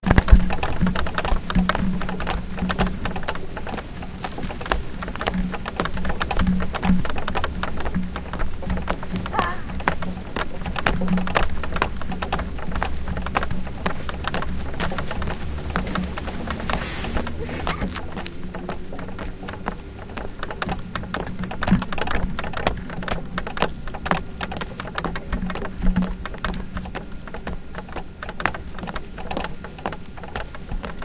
AL SALTAR EN UNA JOGUINA DE FUSTA ESTHER I ZOE
Aquest soroll l'he fet jo saltant en una joguina de fusta, i la meva ajudant Zoe,a grabat el soroll.
DeltaSona, feet, Fusta, jump, Saltar, wood